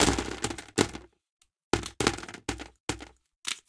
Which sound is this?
delphis ICE DICES LOOP #130-1
PLAY WITH ICE DICES SHAKE IN A STORAGE BIN! RECORD WITH THE STUDIO PROJECTS MICROPHONES S4 INTO STEINBERG CUBASE 4.1 EDITING WITH WAVELAB 6.1... NO EFFECTS WHERE USED. ...SOUNDCARD MOTU TRAVELER...